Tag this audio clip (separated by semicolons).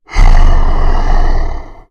arcade; Speak; troll; fantasy; Voice; RPG; monster; Orc; videogame; Talk; Vocal; game; Voices; low-pitch; indiedev; deep; gamedeveloping; gaming; sfx; indiegamedev; brute; videogames; male; gamedev; games